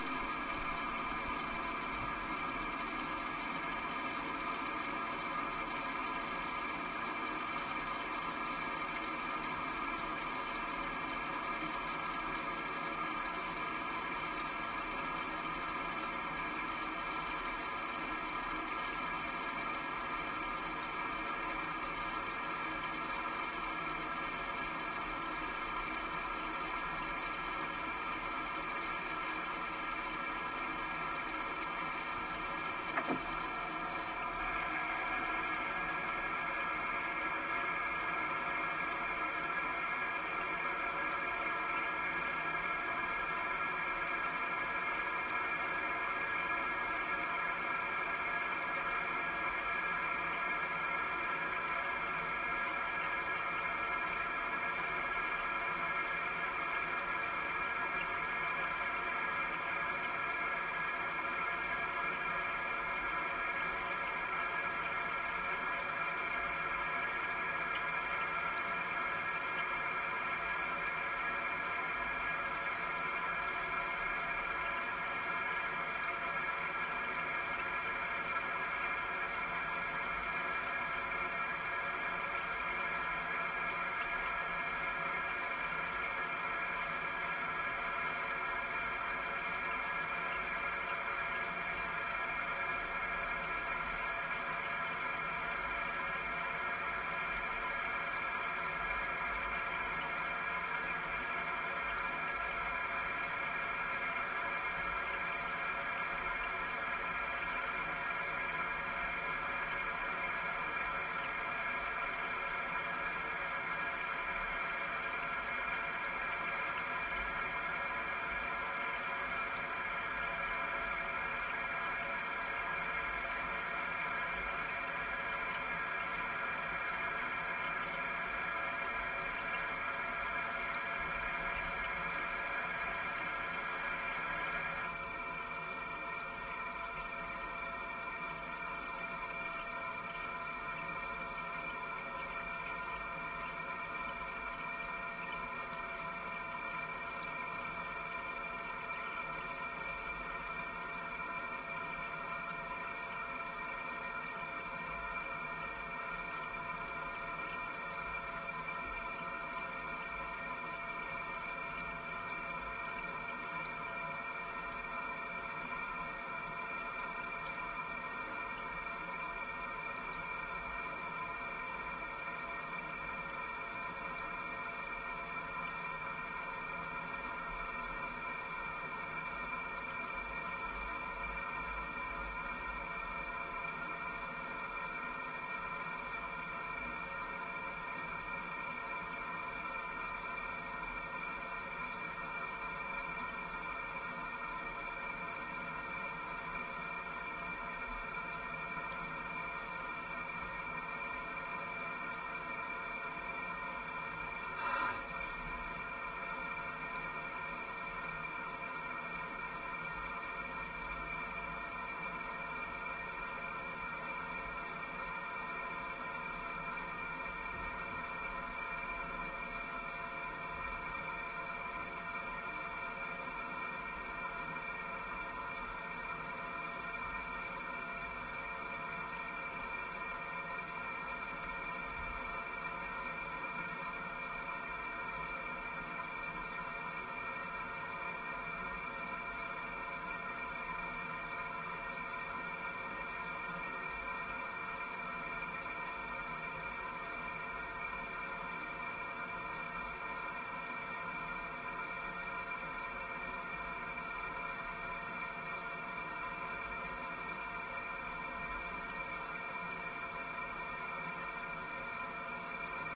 ATM CONTACT bathroom wall
Contact mic attached to a wall in a bathroom, you can hear many connecting water activities. Recorded on Barcus Berry 4000 mic and Tascam DR-100 mkII recorder.
atmos, atmosphere, bathroom, behind, flow, wall, water